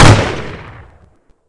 Layered Gunshot 9
One of 10 layered gunshots in this pack.
awesome, pew, shoot, shot, gunshot, layered, cool, bang, gun, epic